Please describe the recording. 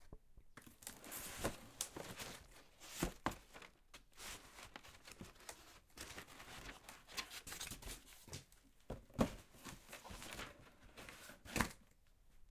Recording of a large cardboard box being opened and closed. Recorded with Zoom H6 Stereo Microphone. Recorded with Nvidia High Definition Audio Drivers.